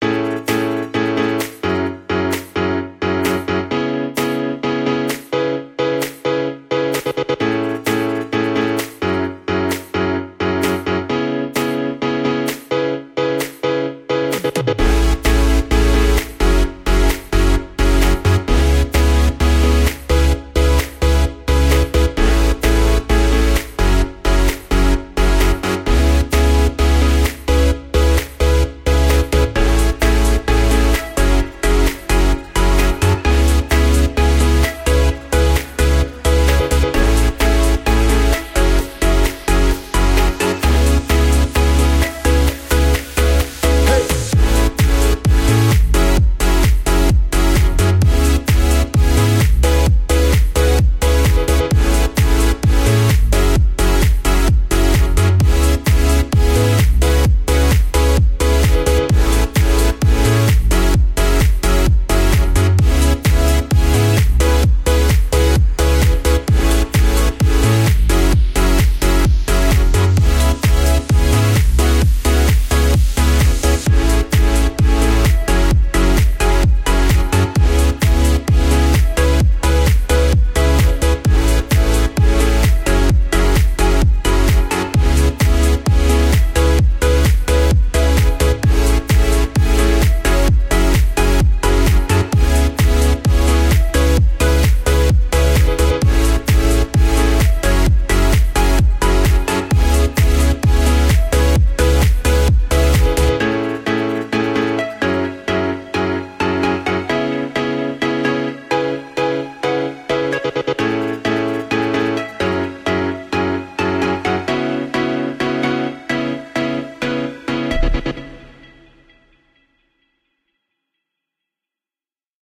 Teaser Background Music
Genre: Synth, Future Bass?
I did compose this on a game project and not accepted because it's not fitting to the game itself as I expected. Regarding to my composition, this should be a short and first version, I'm still thinking to change/improve the melody in the future.
anime, background, future-bass, game, melody, music, synth, teaser, video